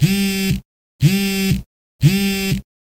cell phone vibrate wood table loopable
Loopable recording of a Nexus 6 cell phone vibrating on a wood table. Recorded with my Zoom H6.
alarm, buzz, cell, vibrate